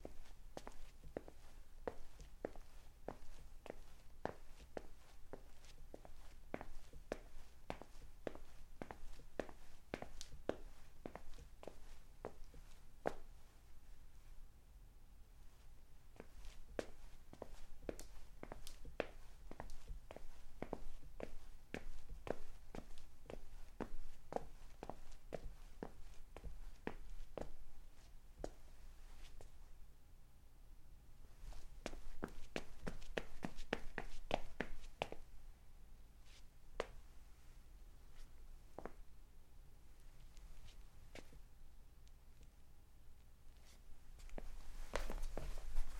pasos - foot steps
grabación de mí caminando en un piso de madera / recording of me walking on a wood floor
caminando, caminar, floor, foley, foot, madera, pasos, pisadas, steps, walk, walking, wood